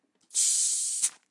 Recording of me opening a carbonated soft drink. Recording includes the sound of gas escaping and the cap twisting off. Recorded with The XYH-6 Microphones on the Zoom H6.